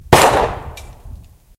.38 targetside metal
recorded at the target of a couple 38 caliber shots
38, field-recording, fire, gun, impact, report, rifle, target